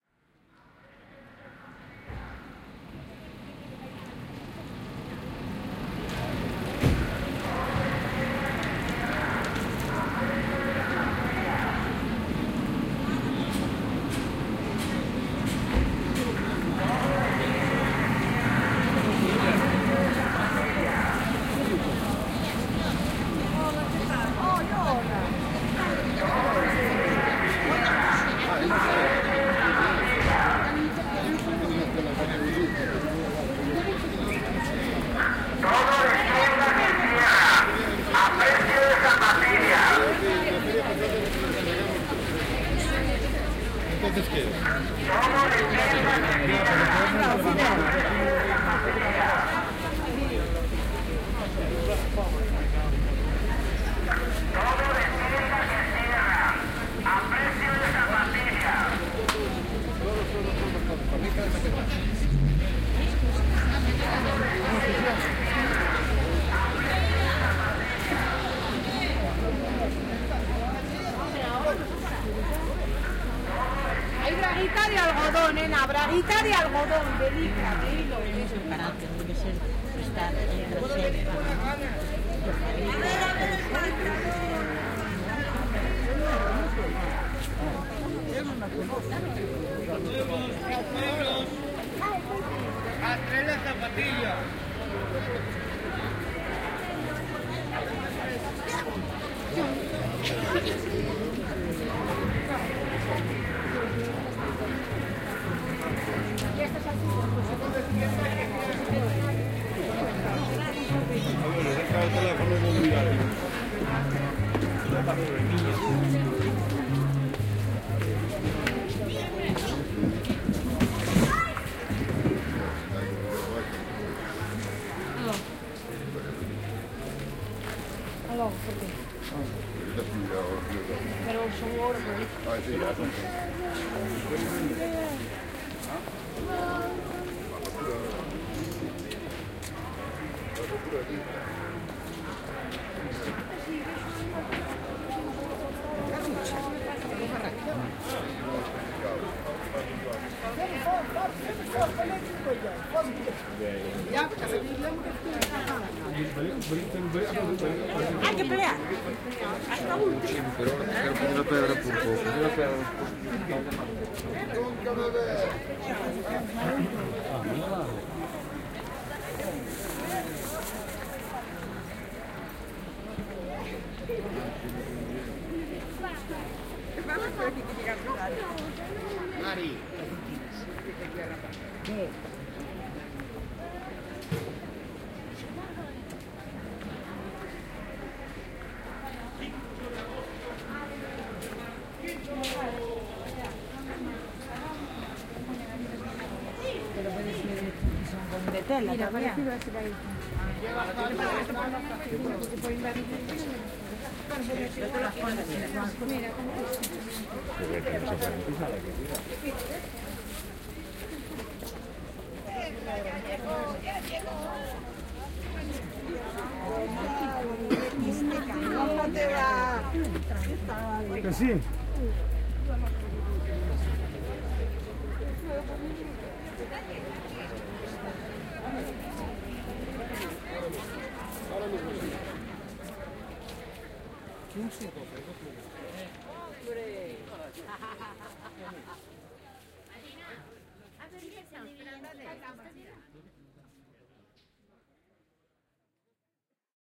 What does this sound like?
Feira de Chantada, Lugo
Walking over the fair-market of Chantada (Lugo) in the morning.
ambient, binaural, fair-market, field-recording, galicia, soundscape, walking